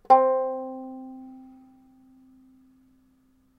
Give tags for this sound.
banjolele; string; hard

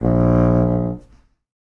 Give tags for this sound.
wind; fagott

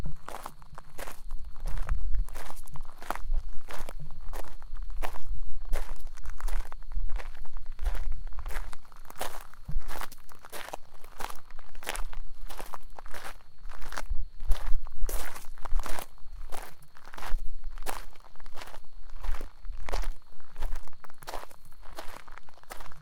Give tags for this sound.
footsteps; walk; outside; steps

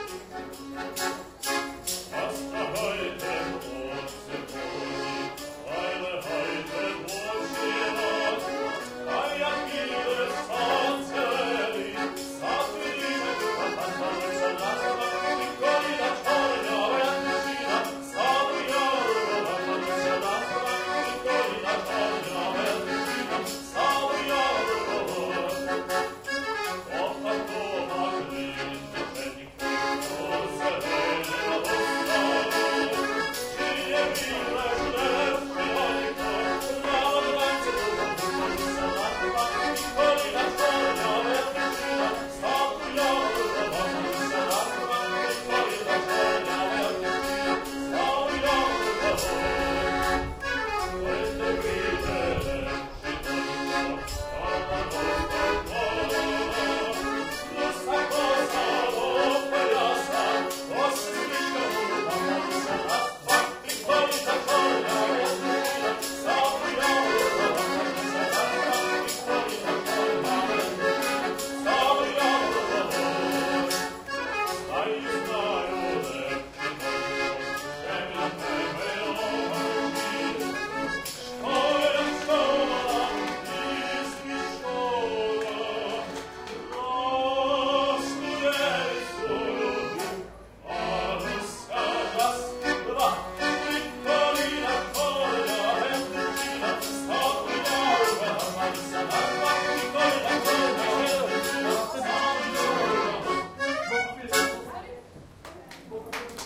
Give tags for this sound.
Accordion; busker; city-wall; crowd; field-recording; Florianska; harmonium; Krakow; Poland; singer; street; street-musician; street-performer

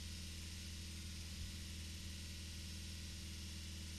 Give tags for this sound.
benz; car; dynamometer; dyno; engine; mercedes; vehicle; vroom

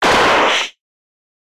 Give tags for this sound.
audio; clip; handgun

